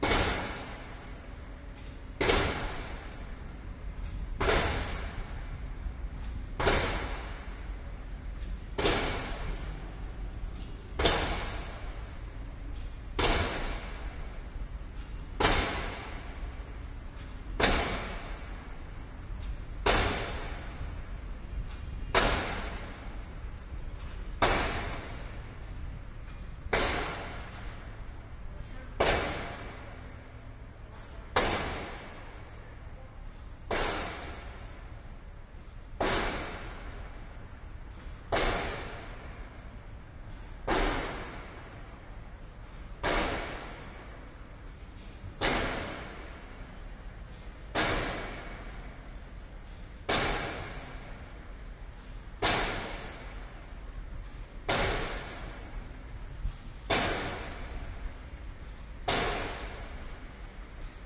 PILEDRIVER BINAURAL
Binaural recording of a pile driver in a reverberant city space